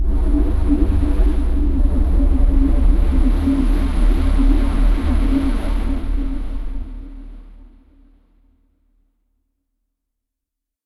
nog_ soundscape (cavernous audio)